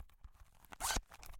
Zipper of rugsack. Recorded in the Anechoic chamber of the HKU using MOTU-896 interface and Studio Projects B-1 LDM.